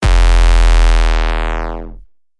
layered distorted 808 in a DnB style - enjoy.
808, metalheadz